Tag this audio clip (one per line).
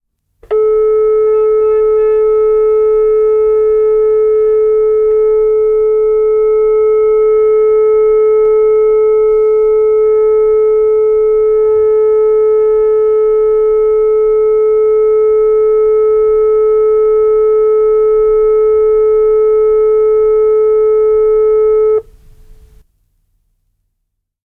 Dial-tone
Field-Recording
Finland
Finnish-Broadcasting-Company
Lankapuhelin
ni
Phone
Puhelin
Puhelinlinja
Soundfx
Suomi
Tehosteet
Telephone
Valinta
Yle
Yleisradio